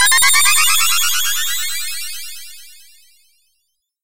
Sparkling Star 04
A star sparkling from far, far away. So far away in the vast universe.
This sound can for example be used in fantasy films, for example triggered when a star sparkles during night or when a fairy waves her magic wand - you name it!
If you enjoyed the sound, please STAR, COMMENT, SPREAD THE WORD!🗣 It really helps!
fairy, fantasy, flash, gem, gems, magic, saga, shining, sparkle, sparkling, sparkly, spell, star, twinkle, twinkling